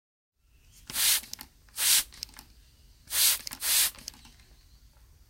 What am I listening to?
A hissing, squirting sound made by a non-pressurised spray bottle.
mist, clean, maid, cleaning-product, spray, spraying, hiss, deodorant, aerosol, squirt